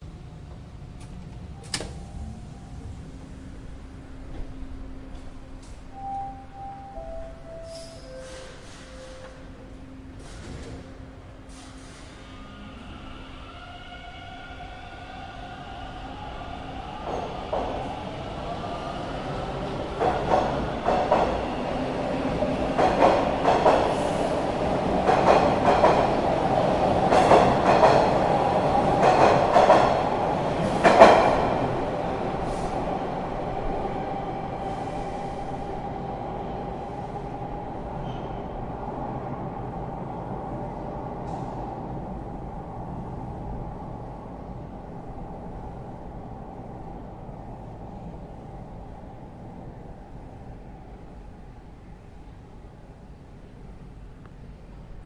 Subway train pulls out of exits station.